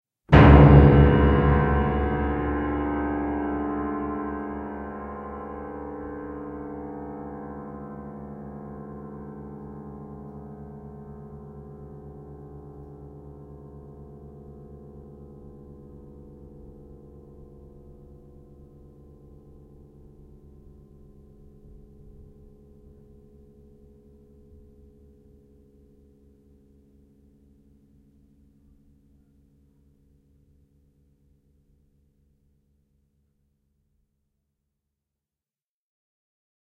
Low Piano Minor 2nd + Timpani hit long resonance
Low minor second interval in a grand piano plus a timpani attack, with long resonance.
tension, scary, piano, dramatic-dissonance, suspense, long-resonance, timpani